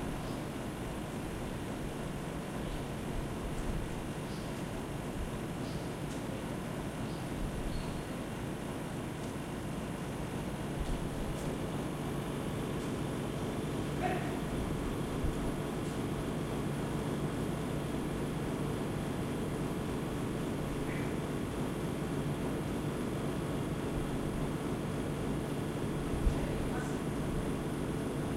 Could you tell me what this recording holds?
An air conditioning fan somewhere in a street in barcelona.
fan-noise, street